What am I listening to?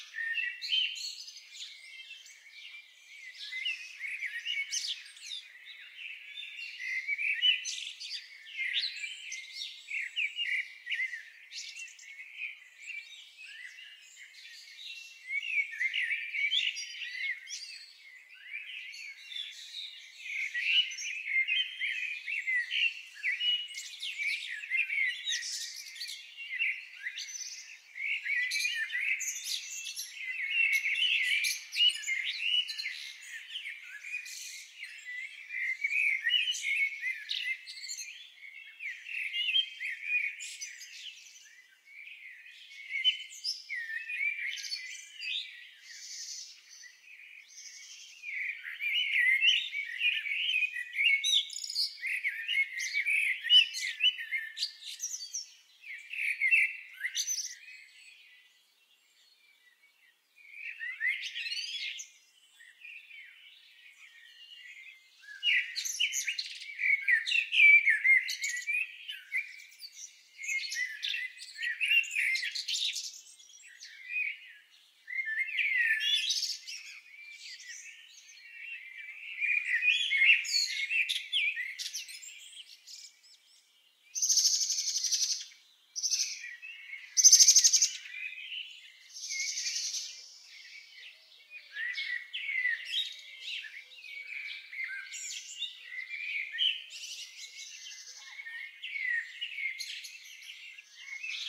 Birds in the morning in small town (cleaned and looped verison)
Mic: AKG P420 Interface: Presonus studio 18|10
Recorded through window in my room in Piotrkow Trybunalski (Poland).
I cut out cleanest fragments from files I previously uploaded and do some noise reducing.
field-recording, small, birds, morning, loop